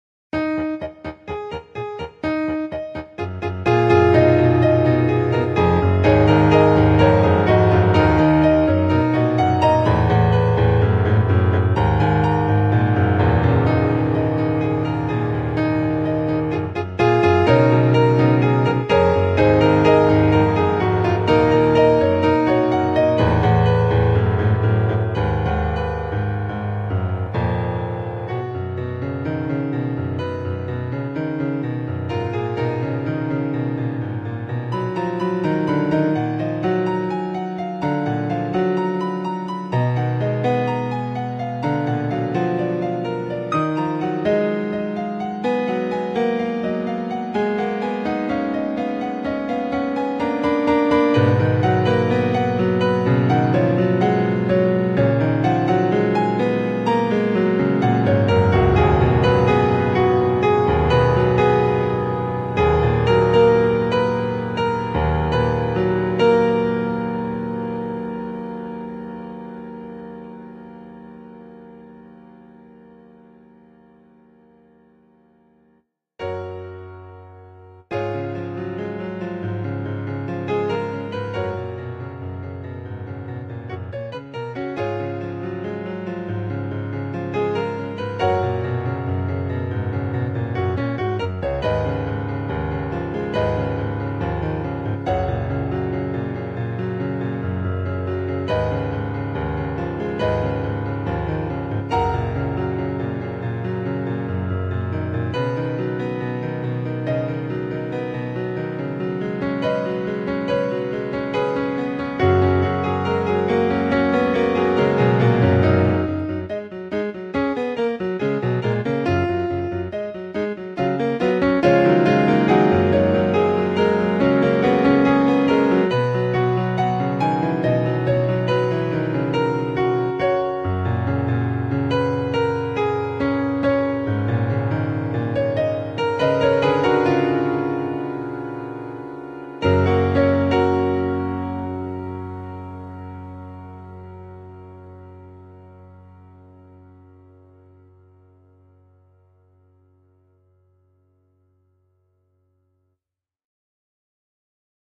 Difficult Choices
classical, music